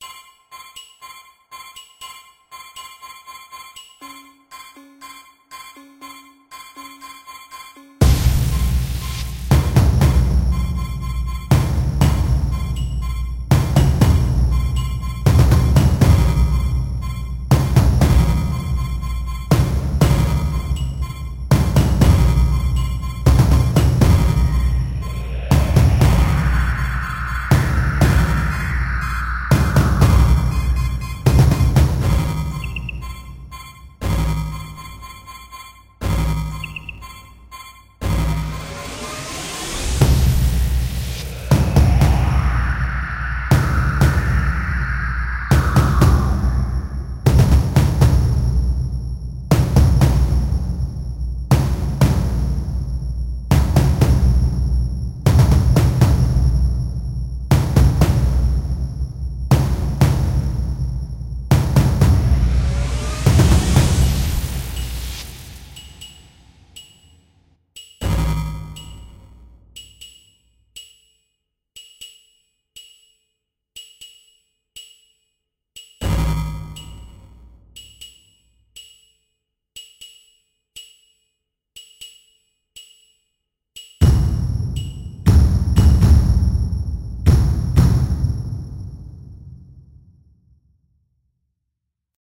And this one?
Horror Film Score I
This is a brief sample of some horror movie style music I have been working on. I am interested in contributing things I have created to films and video games.
film, terrifying, horror, haunted, drama, fear, scary, music, terror, suspense, bakground, creepy, spooky, sinister, dark, thrill, movie, cinematic, score